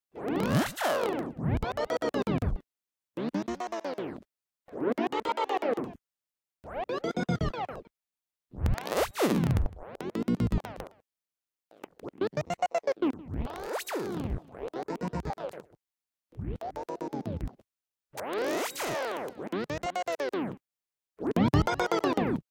Glitch Vinyl Scratch
fracture, record, scratch, scratching, scrub, tape, vinyl